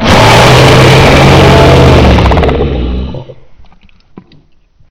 cloverfield roar
a monster roar type deal.
kind of reminded me of the monster from clover field.
cloverfield, monster-roar, roar